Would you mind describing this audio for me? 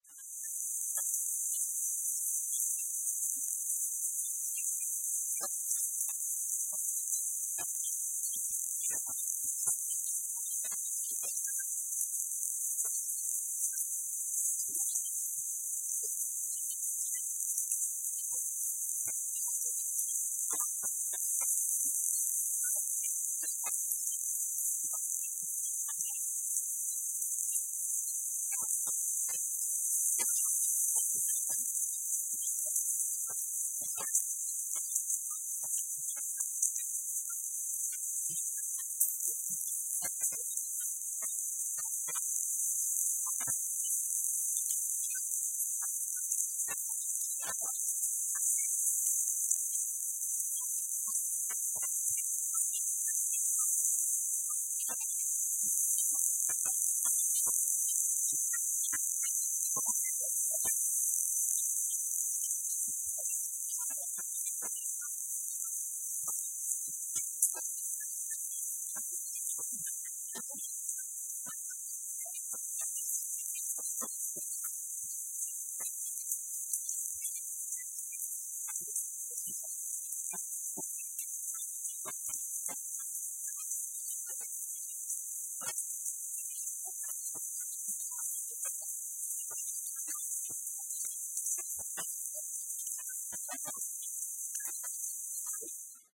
stereo recording of rain, in a paris street during an afternoon storm. rain, some vehicles passing on wet road, light thunder in background
rain, weather, street